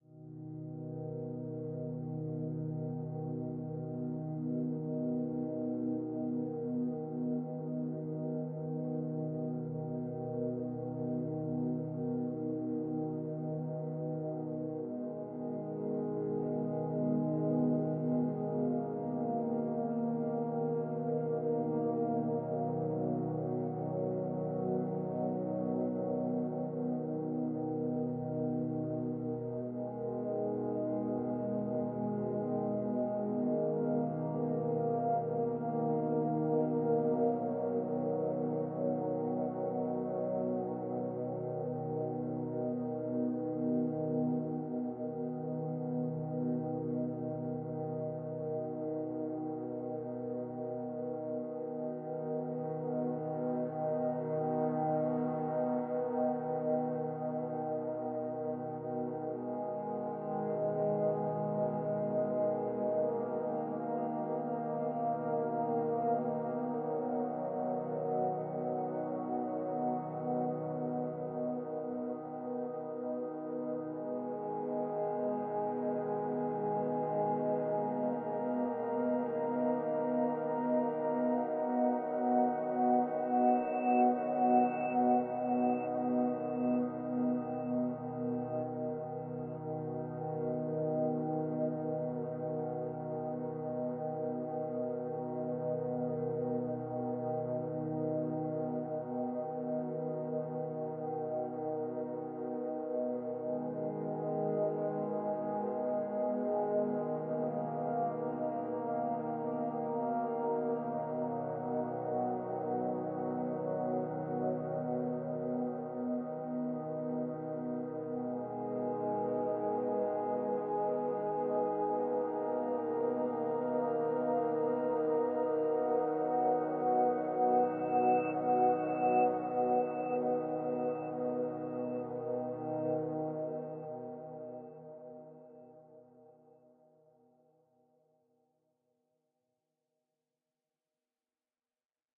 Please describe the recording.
A peaceful music piece